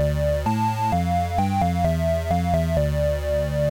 Audiosample min version
A simple melody in D minor
D-minor, monophonic, synth